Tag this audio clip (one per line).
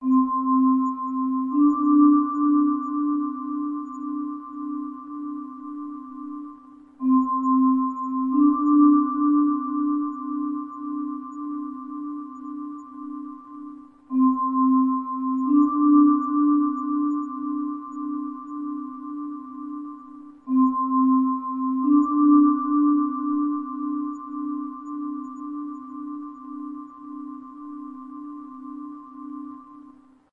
sleeping
sleep